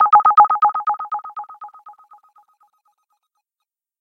Polite Warning Tone
Could be an innocent ringtone... or maybe something more sinister - an alarm or warning of some kind.
ring, spot-fx, radar-return, effect, sound-design, fx